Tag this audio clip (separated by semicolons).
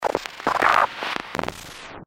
hiss; electronic; noise; click; glitch; sound-design; 1-bar; rhythmic; processed; loop; industrial